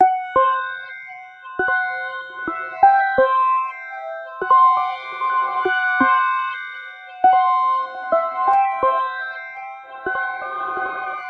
Synthloop I created with Reason.
electronic, suspense, synth, techno